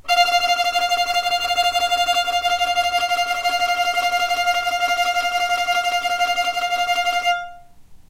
violin tremolo F4
tremolo, violin